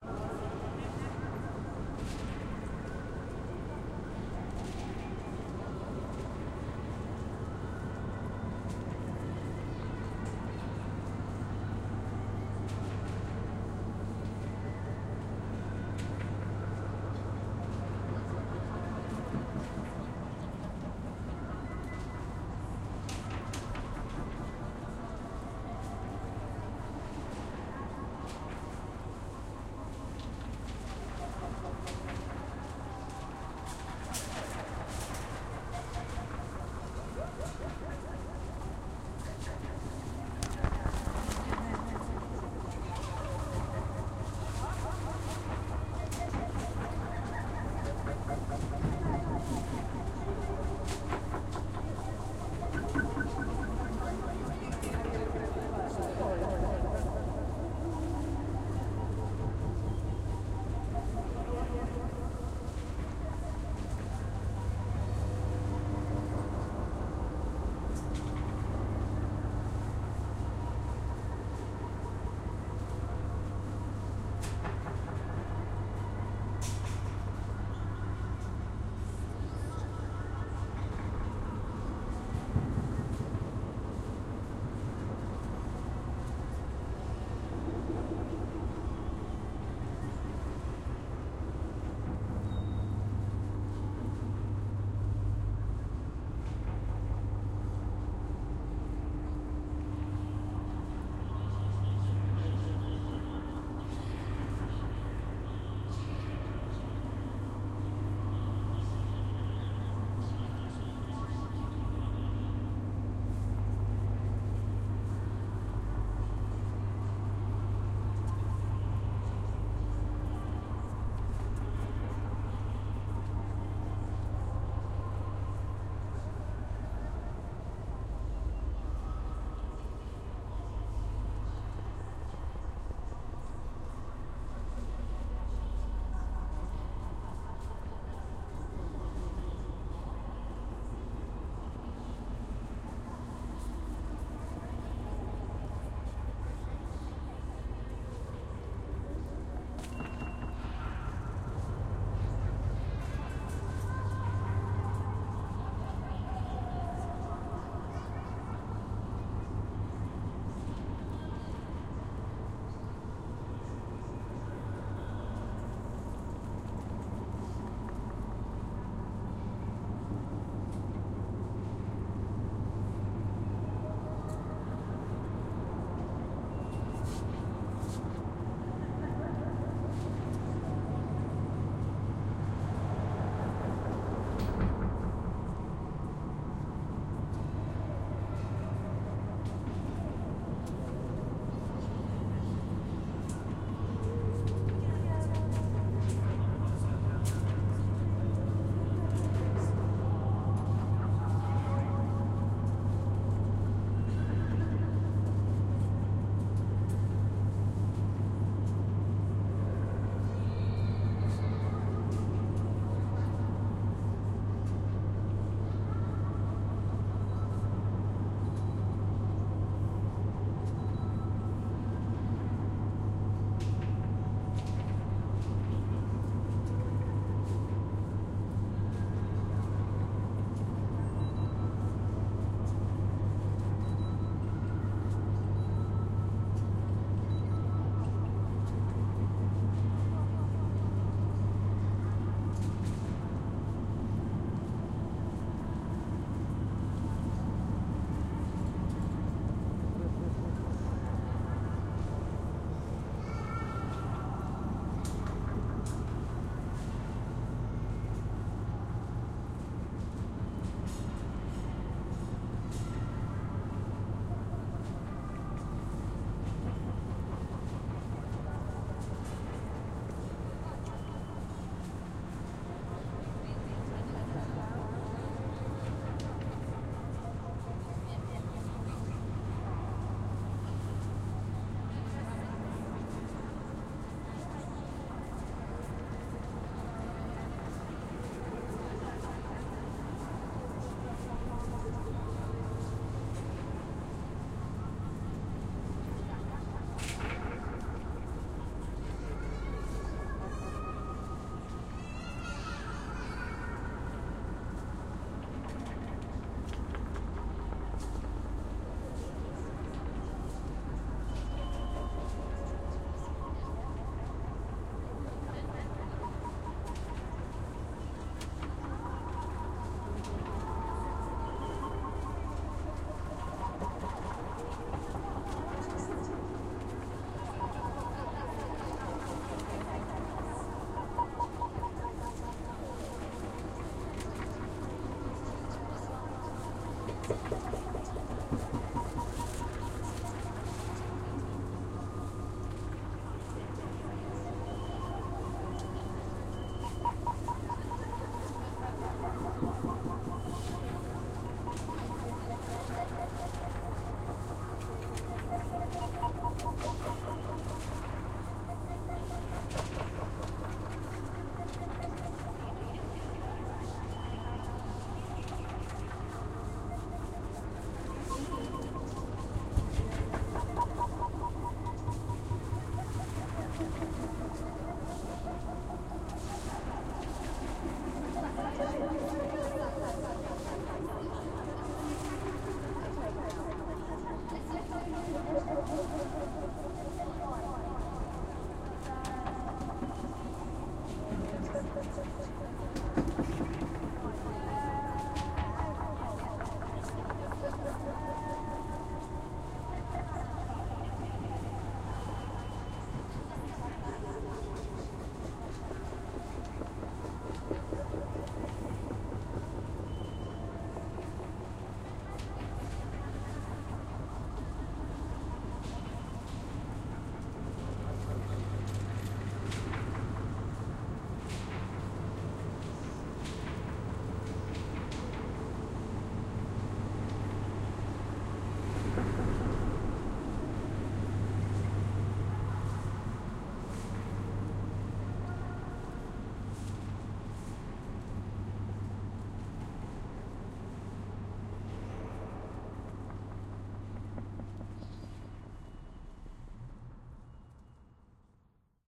Supermarket checkout mixdown dub delay
Supermarket in Great Yarmouth recording put through a dub delay
yarmouth, delay, nnsaca, norfolk, reverb, great, dub, echo